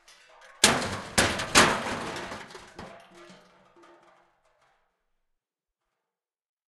COKE CANS DISPOSAL C617 011
There were about twenty coke cans, four plastic cups, a garbage pail and an empty Culligan water bottle. These were arranged in various configurations and then kicked, dropped, smashed, crushed or otherwise mutilated. The sources were recorded with four Josephson microphones — two C42s and two C617s — directly to Pro Tools through NPNG preamps. Final edits were performed in Cool Edit Pro. The C42s are directional and these recordings have been left 'as is'. However most of the omnidirectional C617 tracks have been slowed down to half speed to give a much bigger sound. Recorded by Zach Greenhorn and Reid Andreae at Pulsworks Audio Arts.
bottle, dispose, can, metal, empty, destroy, cup, garbage, plastic, crush, half, speed, drop, bin, metallic, c42, crash, pail, coke, hit, thud, c617, chaos, container, npng, destruction, josephson, rubbish, impact, smash